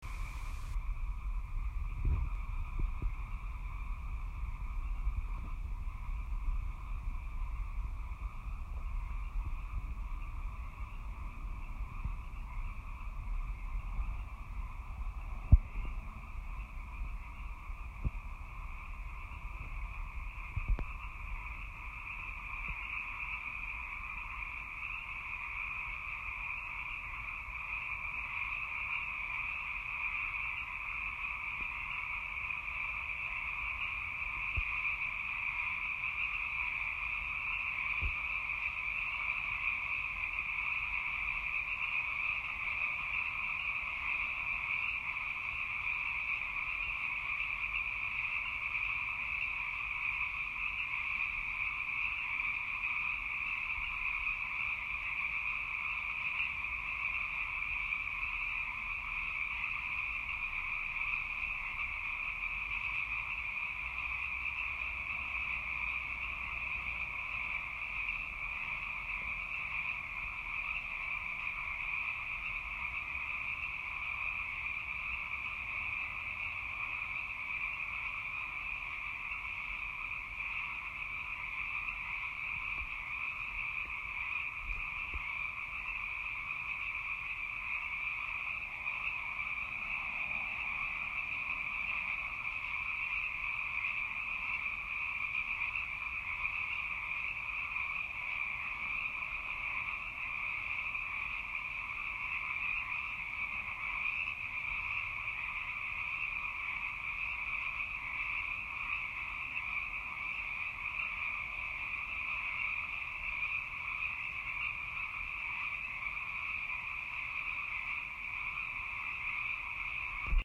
After several days worth of rain clears, a chorus of frogs emerge at night.